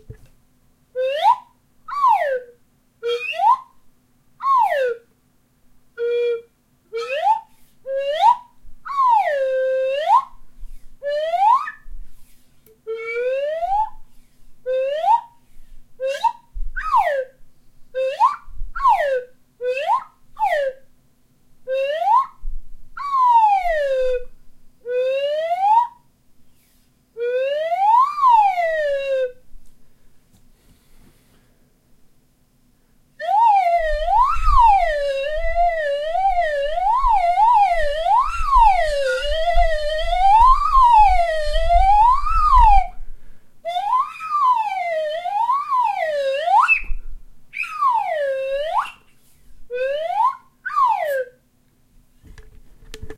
Slide whistle 2
More slide whistle sounds. This time some quicker "up" sounds and a longer wobble sound at the end
slide, whistle, wobble